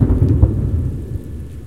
Distant boom from a large thunderstorm system covering the Yangtze River Plain.
ambience, ambient, atmos, atmosphere, background